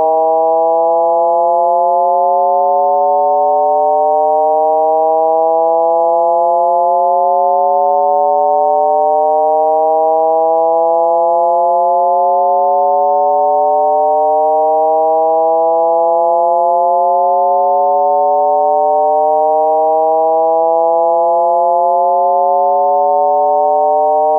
On going research at the Shepard's tone
barberpole, going-up, shepard-tone